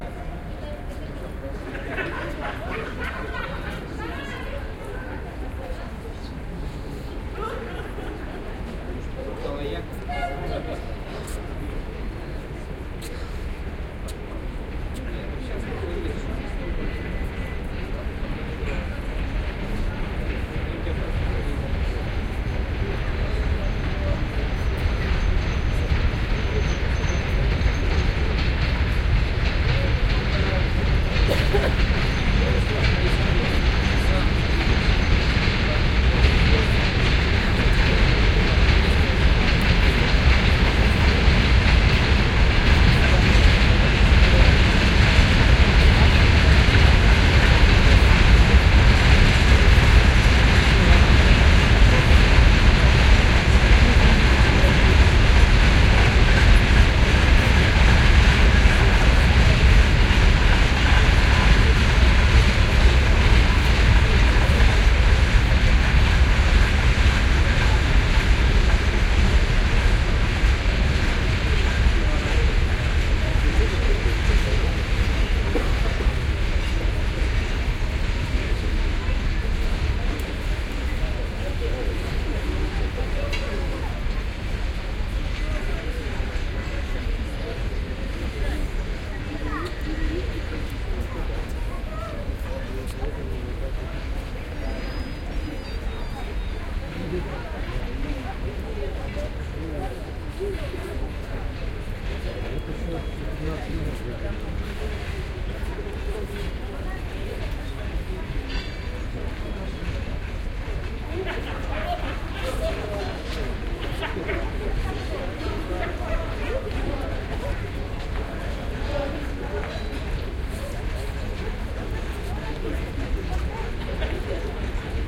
20-donetsk-train-station-nigh-freight-train-passing-by-in-distance
Yet another freight train passes by in donetsk. It blows it's whistle shortly. Lot's of people talking and laughing in the background. Again we hear the typical metal-against-metal grinding noise for stopping trains.
donetsk, field-recording, freight, passing, station, train